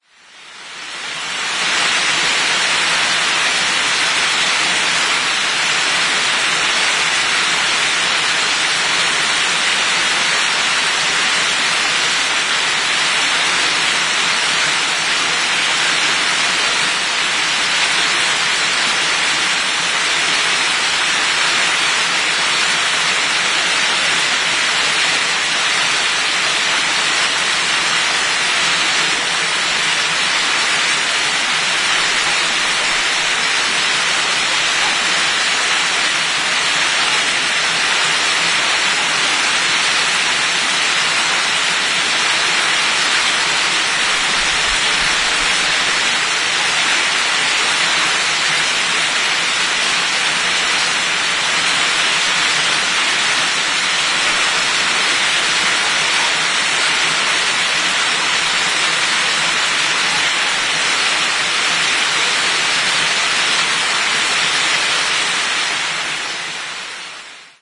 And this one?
08.09.09: about 21.00; Tuesday in Sobieszów (one of the Jelenia Góra district, Lower Silesia/Poland); Młyńska street;
the Wrzosówka river
sobieszow, water